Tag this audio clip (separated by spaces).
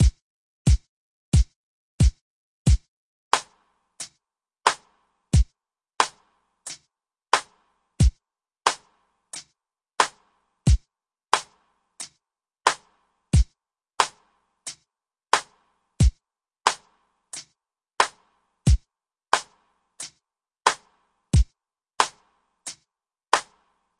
loop beat sample sound-pack